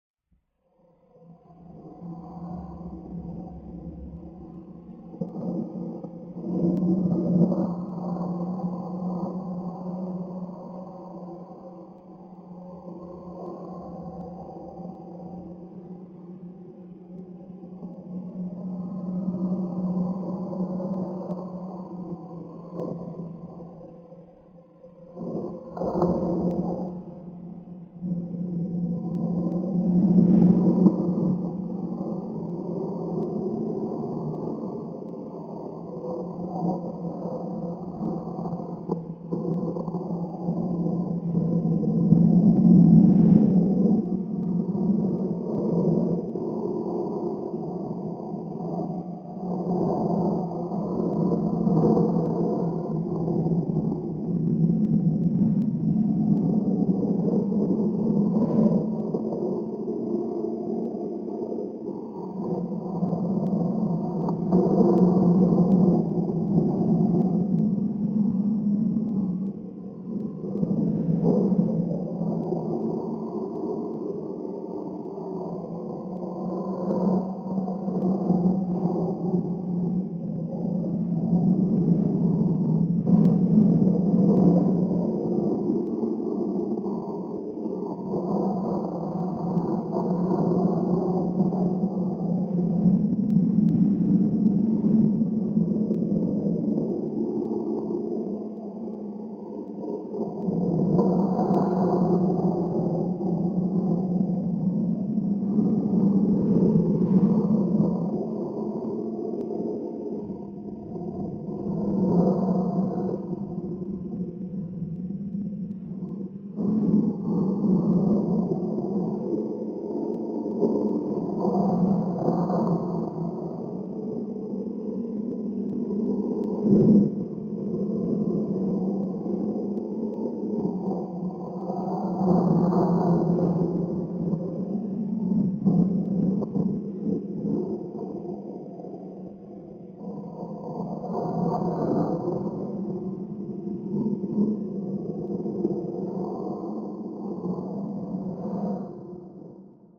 Ambiance-Eerie Wind-001
This was some creepy wind sound I made by pitching down the sound of a utensil being dragged across a counter top, then EQ'ed and added reverb. Some addition laying was also used.
It should work well for a subtle background sound for an eerie scene or alien landscape.